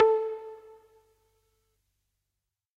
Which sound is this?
Pluck Single A WET PSS560
This is a plucky synth sound created with the Digital Synthesizer section of a Yamaha PSS560 with some added reverb from a reverb pedal. The stereo Symphonic and vibrato modes were ON.
This is part of a sample pack of Yamaha PSS560 drums and synth sounds. I would love to check it out!
80s, electronic, keyboard, lofi, loop, note, pluck, PSS560, sample, sampler, sound, synth, synthesizer, vintage, Yamaha